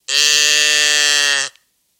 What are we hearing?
Funny cow sound from a little cylindrical cow mooing box